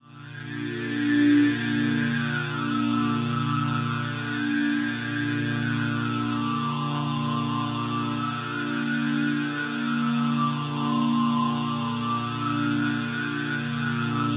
Created using Thor (a Reason built-in synth).
Modified version of Synth_1, also in this pack. Trying to get closer to the sound we were trying to create for our collab track.